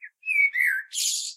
Turdus merula 27
Morning song of a common blackbird, one bird, one recording, with a H4, denoising with Audacity.
bird, nature, blackbird, field-recording